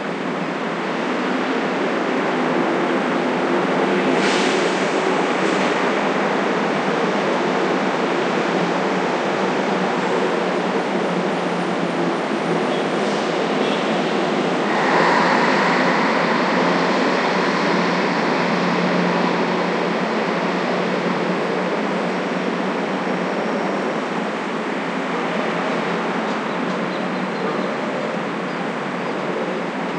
Seamless City Loop
Looping night city ambience.
Mixed for Nothing to Hide.